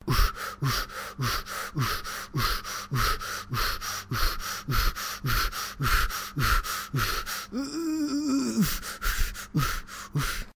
Fast breathing, struggle male
Struggling breathing male